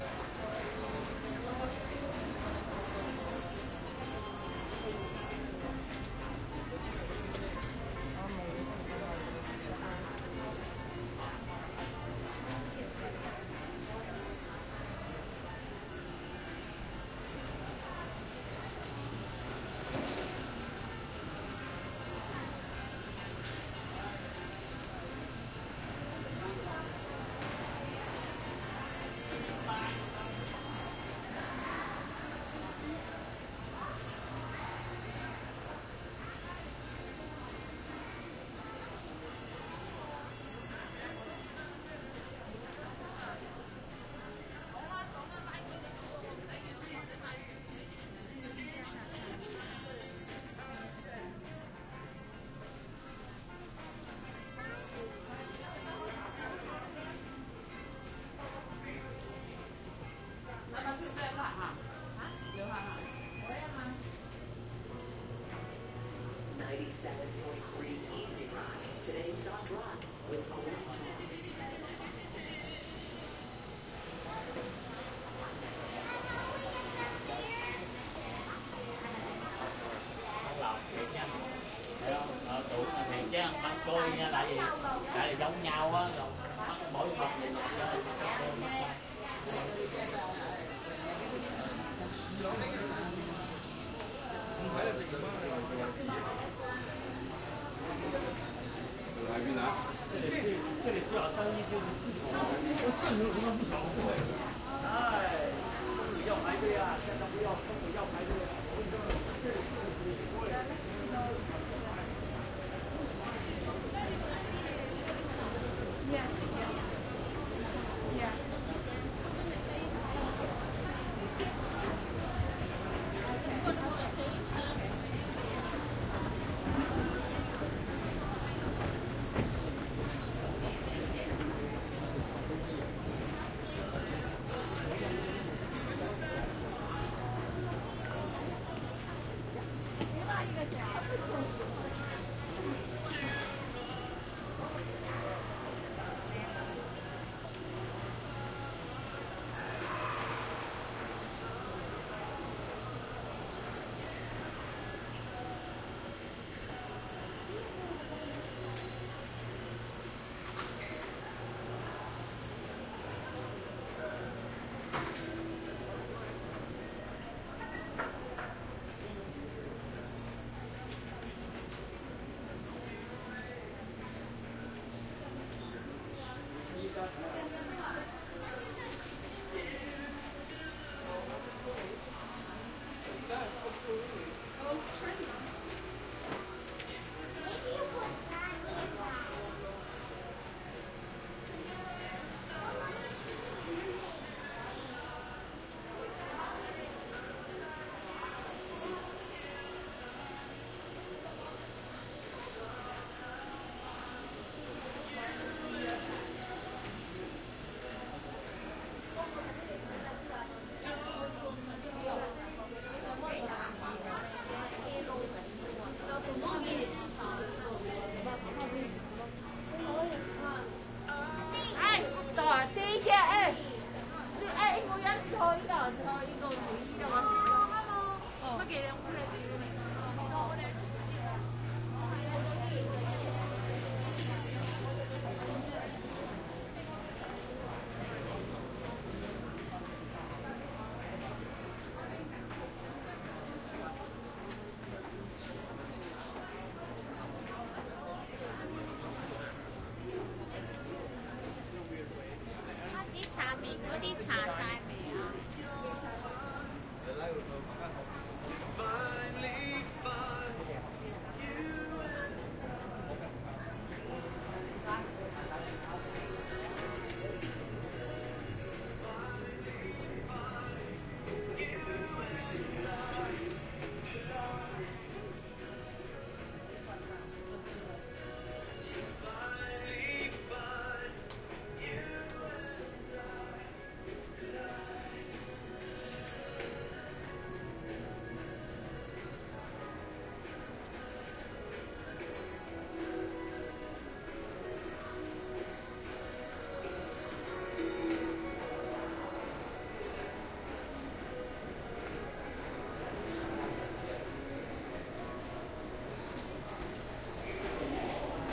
I wandered through the Chinatown Centre Mall in Toronto, Canada. The recording stops a bit early because I accidentally pulled the wire out the the recorder.Recorded with Sound Professional in-ear binaural mics into Zoom H4.
binaural, canada, chinatown, crowd, field-recording, geotagged, inside, mall, people, phonography, shopping, toronto